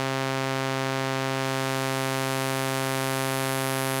Monotron VCF "peak"
C-1 recorded with a Korg Monotron for a unique synth sound.
Recorded through a Yamaha MG124cx to an Mbox.
Ableton Live